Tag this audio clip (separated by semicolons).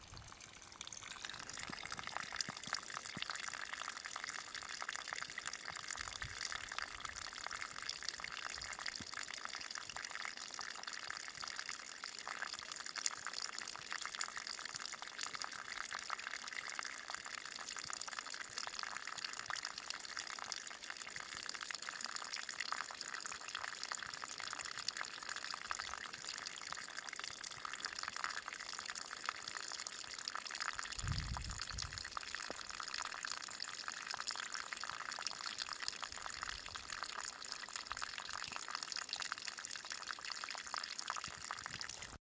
ambient; field-recording; flow; liquid; nature; outside; river; stream; water